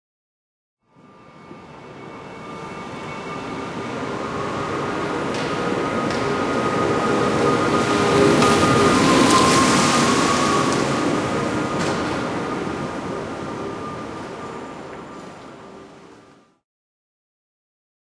electric car DRIVE past ext